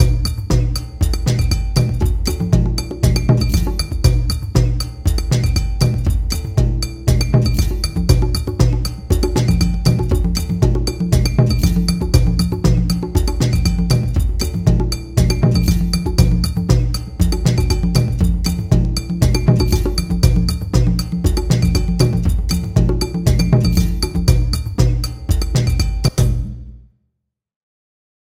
DrumJam 119bpm 2022-07-21 18.04.31
DrumJam Trial Dance drums conga
Beat, Cinematic, Conga, Dance, DrumJam, drum-loop, drums, Ethno, Film, groovy, improvised, Movie, percussion, percussion-loop, Tribal